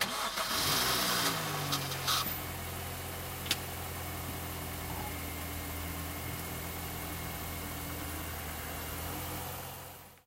20141119 car H2nextXY
Starting car
Recording Device: Zoom H2next with xy-capsule
Location: Universität zu Köln, Humanwissenschaftliche Fakultät, Herbert-Lewin-Str.
Lat: 50.933056
Lon: 6.921389
Recorded by: Rebecca Richter and edited by: Alexej Hutter
This recording was created during the seminar "Gestaltung auditiver Medien" (WS14/15) Intermedia, Bachelor of Arts, University of Cologne.
field-recording, cologne